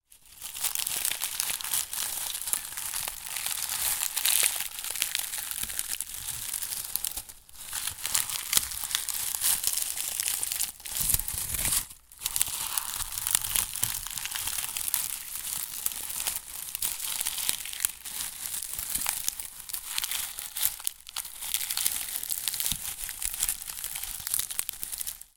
Miked at 3-5" distance.
Onion skins crushed with fingers.